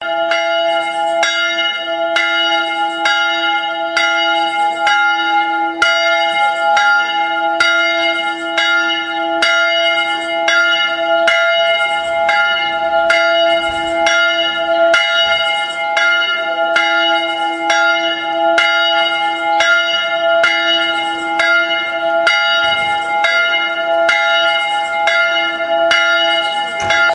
One bell is ringing by itself.
bells, cathedral, church, dong, ringing